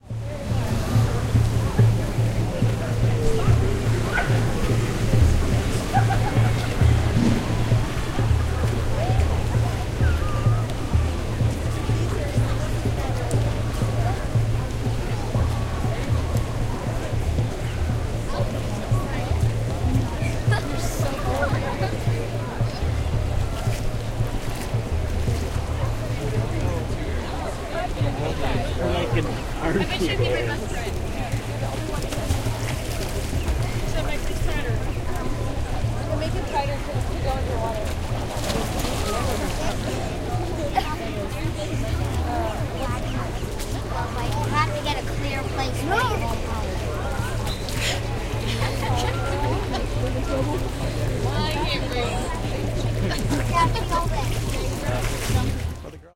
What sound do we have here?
Drum Ambience
AMBIANCE WITH HAND DRUMS IN THE DISTANCE (HIPPIES)!!Recordings made at Barton Springs,a large naturally occurring swimming hole in Austin Texas. Stereo recording made with 2 omni lav mics (radio Shack) into a minidisc. transfered via tascam dm24 to computer for editing.
ambience, atmosphere, city, field-recording, human, pool, swimming, water